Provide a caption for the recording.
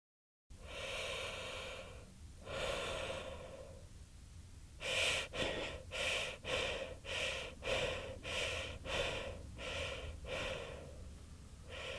a man breathing with his mouth